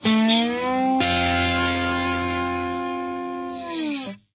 Slides-Slide F Slow-3
Slide guitar slow F 5 chord.
Recorded by Andy Drudy.
Seaford East Sussex - Home Studio.
Software - Sonar Platinum
Stereo using MOTU 828Mk 3 SM57 and SM68
Start into a Marshall TSL1000
Date 20th Nov - 2015
blues, guitar